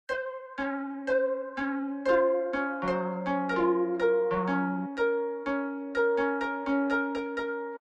Sad Baloon
gateway, loop, pad, sound